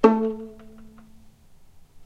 violin pizz vib A2
violin pizzicato vibrato
vibrato, pizzicato